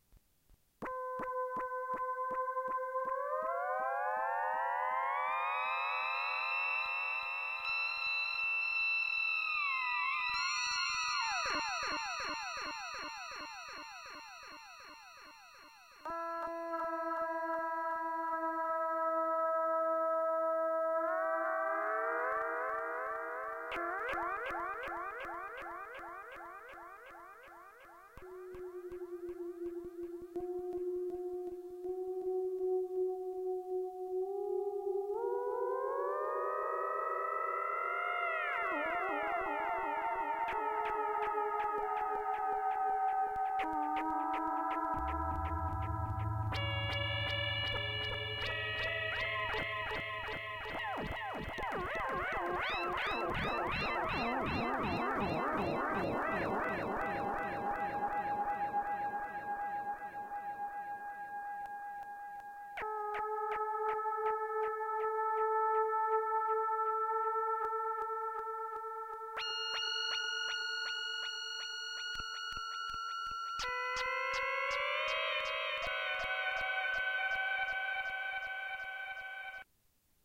Space1 phonesoundtouch Jan2012
These sounds were recorded through my phone with a free app called SoundTouch on my phone.
alien, delays, spacey, touch-phone-app